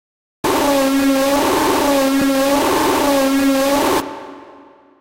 Mike Gabber Intro
This shoulg be in the remix pack, its a Hello Flowers screaming sample,
Pitched down, with some distortion and sort of a phase in and out effect. Sounds like a dying cow.